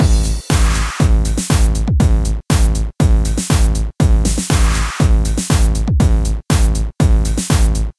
Techno Drum 4/4 120bpm
Another drum loop from a recent song, should loop beautifully at 4/4 120bpm.
120-bpm, bass, beat, drum, loop, techno, trance